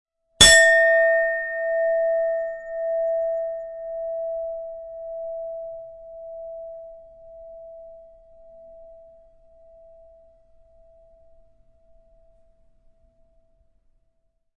Hit that lid!!